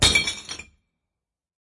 Breaking Glass 10
A stereo recording of breaking a large glass beer bottle. This recording features a bottle being thrown into a plastic recycling bin filled with other glass items. Recorded using Voice Recorder Pro on a Samsung Galaxy S8 smartphone and edited in Adobe Audition.
shatter, breaking, glass, trash, smash, bin, crash, broken, break, recycling, garbage, rubbish, impact, bottle, foley